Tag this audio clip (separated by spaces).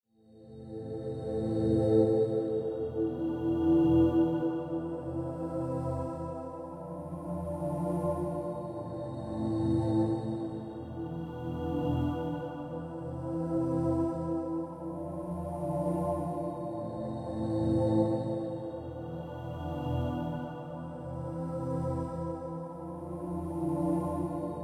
fx synth loop electro pad